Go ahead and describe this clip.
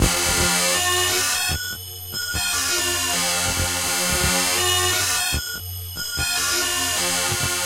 A squeeky, industrial sound.
industrial, machine, machinery, noise, robot, robotic, squeeky, weird